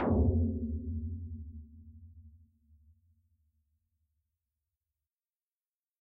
222065 Tom RoomHighReso 09
One of several versionos of a tom drum created using a portion of this sound
which was processed in Reason: EQ, filter and then a room reverb with a small size and very high duration to simulate a tom drum resonating after being struck.
I left the sounds very long, so that people can trim them to taste - it is easier to make them shorter than it would be to make them longer.
All the sounds in this pack with a name containing "Tom_RoomHighReso" were created in the same way, just with different settings.
drum, tom, processed, image-to-sound, dare-26, tom-drum, Reason